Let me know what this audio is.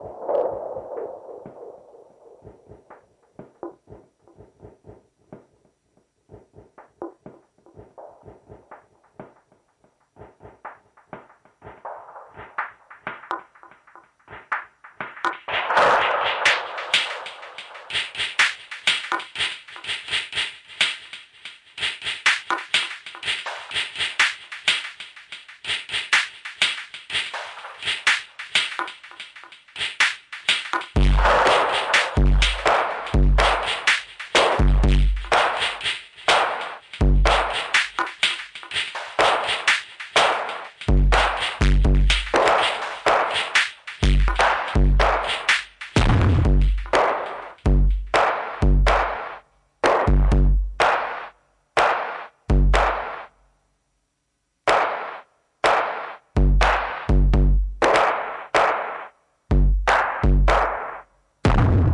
Circuit 7 - Drums 4
Drum Loop
124 BPM
Key of F Minor
percussion, lofi, beat, oregon, hardware, processed, loop, electronica, psychedelic, electronic, downtempo, experimental, noise, synth, drum, portland, industrial, ambient, bass, synthesizer, digital, evolving, music, dark, sample, analog, dance